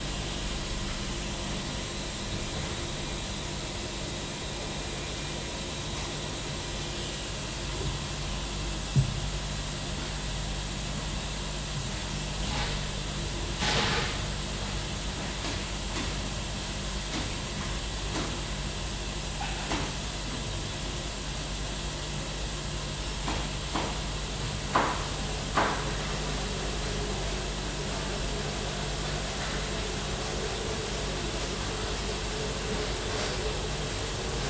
Recorded with Zoom N2 in a Post Service sorting facility. Letter sorting machine.